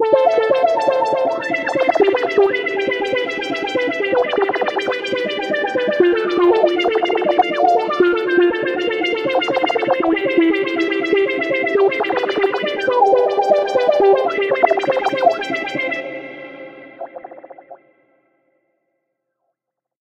ARP C - var 5
ARPS C - I took a self created sound from Gladiator VSTi within Cubase 5, played some chords on a track and used the build in arpeggiator of Cubase 5 to create a nice arpeggio. Finally I did send the signal through several NI Reaktor effects to polish the sound even further. 8 bar loop with an added 9th and 10th bar for the tail at 4/4 120 BPM. Enjoy!